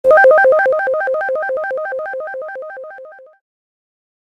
MA SFX 8bit Portal 2
Sound from pack: "Mobile Arcade"
100% FREE!
200 HQ SFX, and loops.
Best used for match3, platformer, runners.